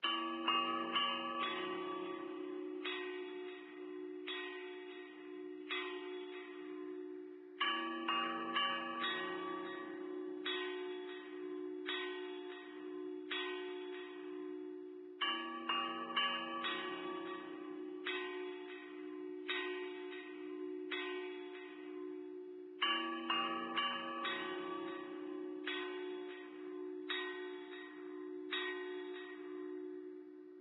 Recorded different signals from my cellphone Edited. ZOOM H1.